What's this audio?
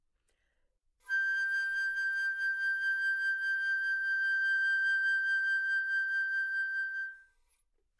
overall quality of single note - flute - G6
Part of the Good-sounds dataset of monophonic instrumental sounds.
instrument::flute
note::G
octave::5
midi note::67
good-sounds-id::487
Intentionally played as an example of bad-dynamics
single-note, G5, multisample, flute, neumann-U87, good-sounds